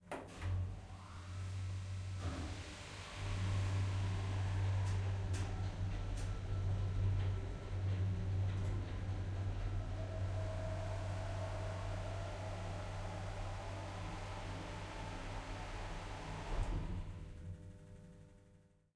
elevator travel 6d
The sound of travelling in a typical elevator. Recorded at the Queensland Conservatorium with the Zoom H6 XY module.
elevator, moving, travelling